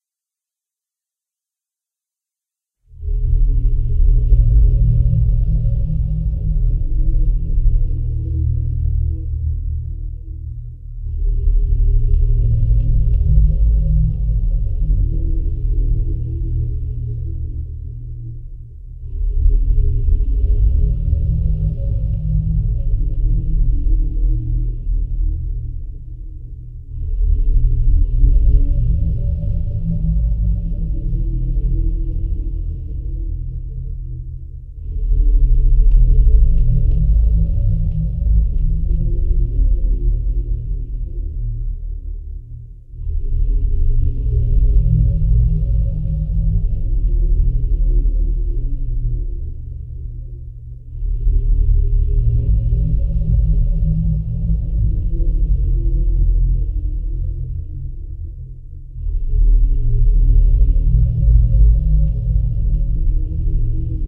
Signpost
2 oscillators, reverb.
Created with Psychic Modulation (Aethereal)
Mixed in Audacity
cavern, darkglitch, dark, fx, glitch, sound, ambient, effect, dusky, ambiance, gloomy, shape, sign, wave, raw, odds, sorrow, evil, soundscape, osc, scorn, darkness, modulate, post, dull, dismal, black